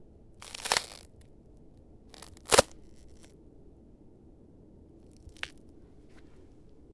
Foot with sneaker cracking an ice sheet, outdoors. Ice cracks and stresses.
crack, foot, ice, outdoor, sheet, step, stress, winter